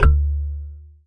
wire pizz 7
A small piece of thin steel wire recorded with a contact microphone.
sound, wire, effect, close, steel, soundeffect, fx, microphone, sfx, contact, metal